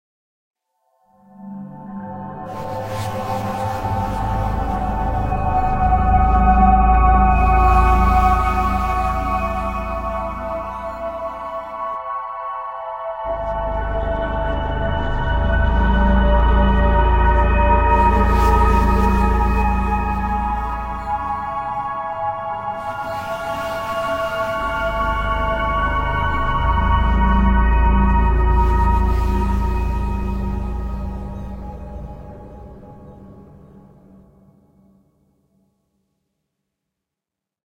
Das Artefakt 2
A set of variations of a scary soundscape.
Entirely made on a PC with software. No Mic or Instrument used. Most of the software I used for this is available for free. Mainly used: Antopya, Chimera and the Alchemy Player.
Horror, Science-Fiction